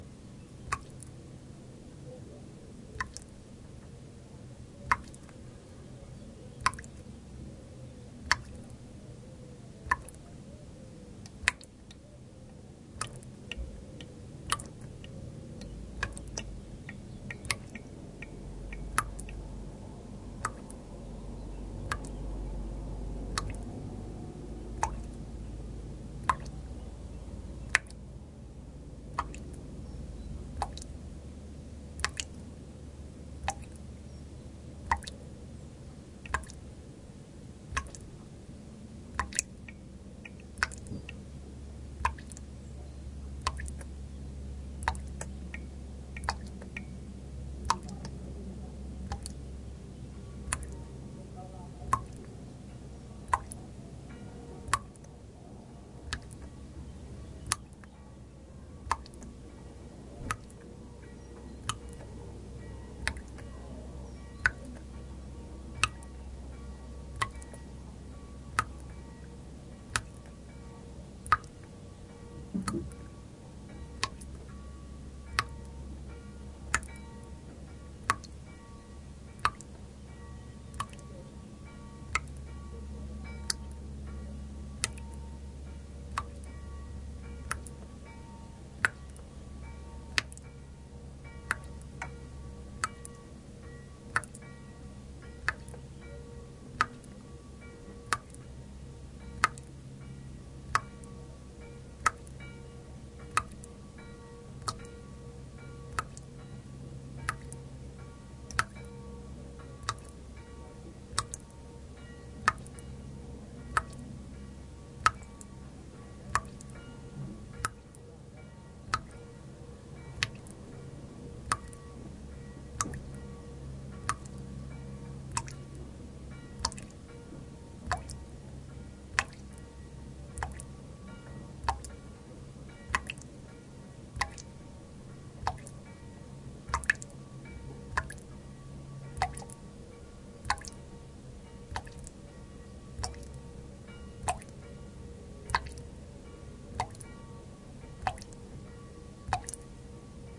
Waterdrops with churchbells in the background
Waterdrops indoor, churchbells from far away
utrecht, field-recording, waterdrops